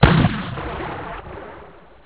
A quicky throaty bomb blast suitable for artillery or something.
Bomb, Blast, Artillery